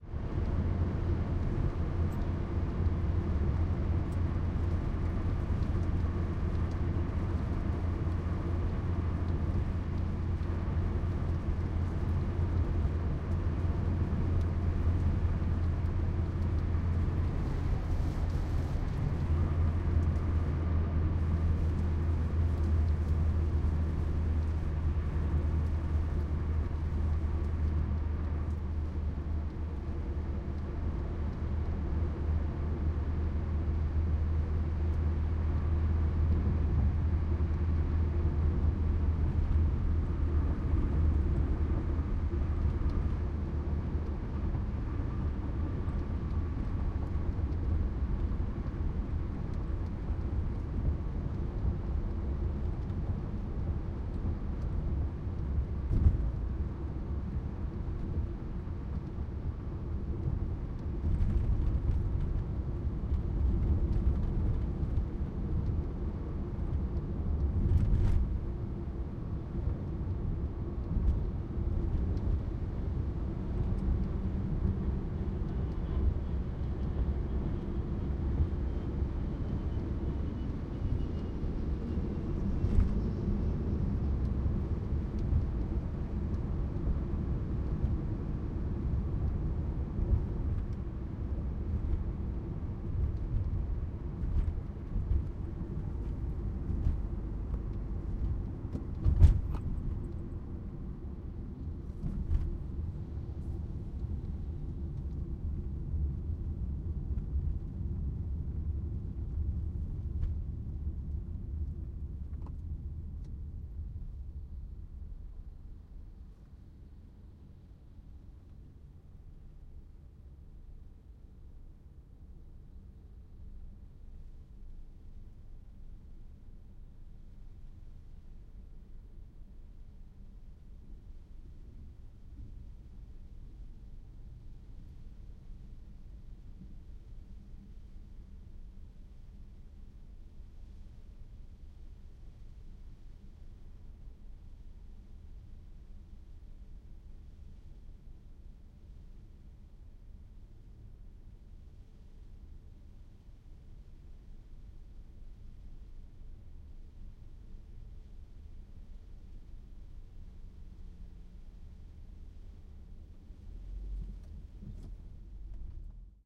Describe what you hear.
HIGHWAY DRIVING exit idle IN LIGHT RAIN rear
rear pair of H2 in quad. Highway driving in light rain, exit with road thump(s), and then idle with light rain - no wipers. Toyota small car 100km down to 0. Windows shut but low level extraneous noise including plane passover.